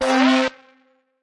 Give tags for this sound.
audio
gameaudio
soundeffects
indiegame
effects
game

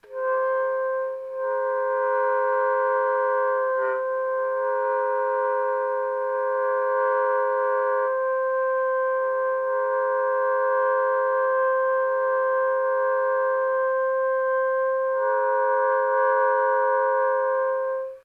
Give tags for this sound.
sax
saxophone
soprano-sax

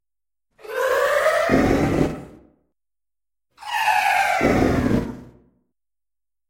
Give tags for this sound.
ai
android
app
bionic
command
computer
cpu
cute
digital
droid
gadget
game
game-design
game-sound
interaction
interactive
interface
machine
off
robot
robotic
science
sci-fi
turn-off
turn-on

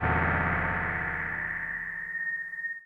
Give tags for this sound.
happy-new-ears
sonokids-omni

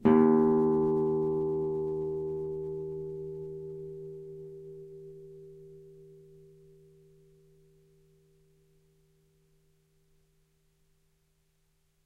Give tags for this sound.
music
string
guitar
nylon
low-d
strings
note

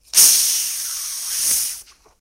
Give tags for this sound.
Pressure
Open
Bottle
Soda

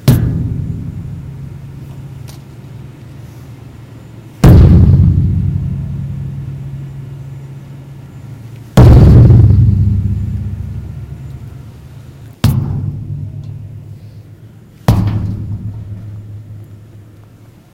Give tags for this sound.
thud
bang
dumpster
kicking
thump
impact

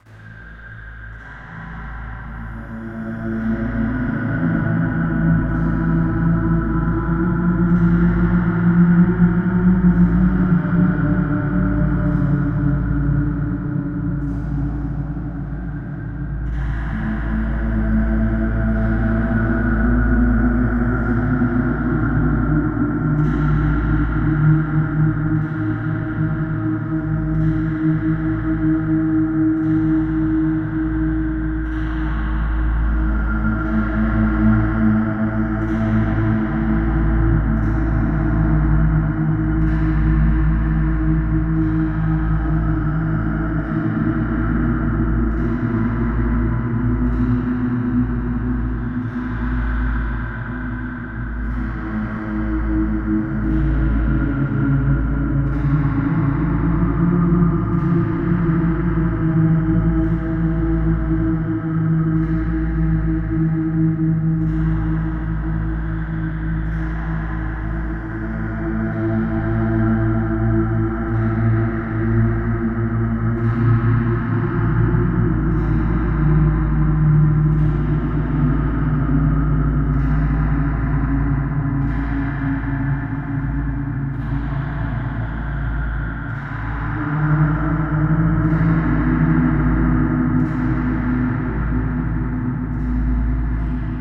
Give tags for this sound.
Sound-Design
Thriller
Amb
Horror
Fantasy
Church
Sci-Fi
Creature

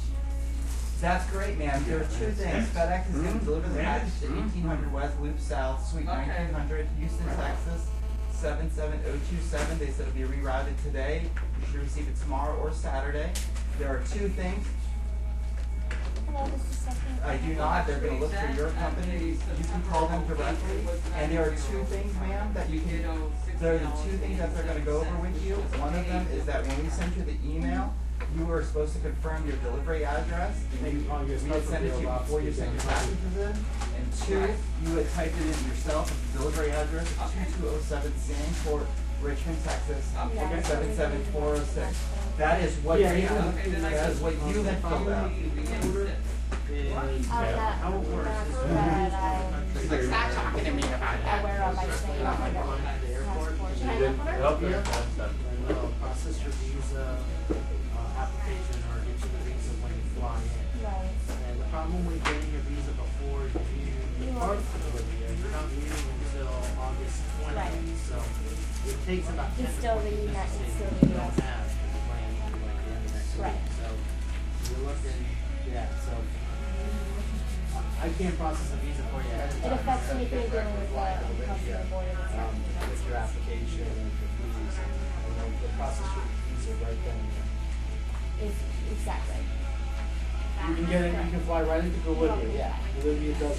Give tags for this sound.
field-recording
office

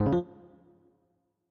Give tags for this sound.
digital
short
blip

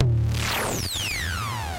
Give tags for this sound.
future-retro-xs
tube
tom
tr-8
low
symetrix-501
metasonix-f1

